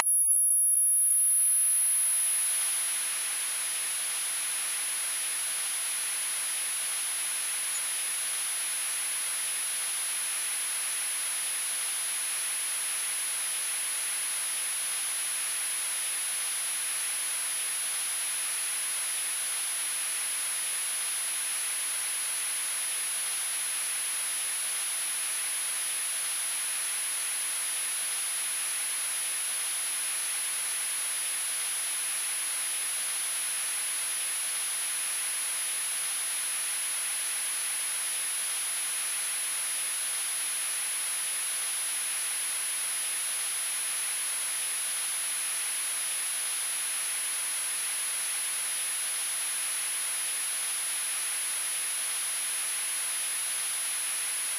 TV-on
this is the initial button push, the electronic squeal of energized circuitry and subsequent static of turning on a TV that is on a dead channel.
A "swatch" culled from the back end of the sound can be looped for continuous static and electronic squeal.
made with Fruity Loops oscillators.
electronic, static, tone, tv